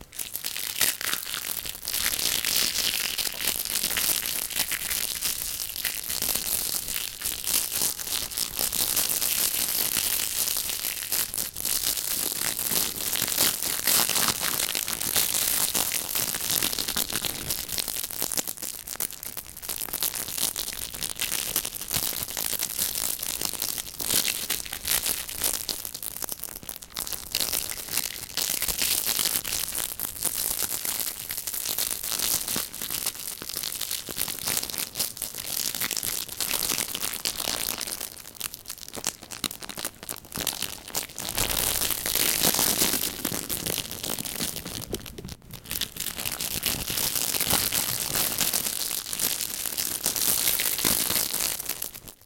Crackling Plastic
Sound Design - Processed recording of plastic wrapping.
wrapper, plastic, crackle, wet, processed, sound, design